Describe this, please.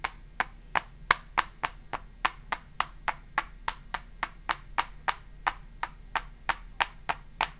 Banging wooden shoes together in front of a cheap Radio Shack clipon condenser.
percussion, sound, walking, free, shoe, household, sample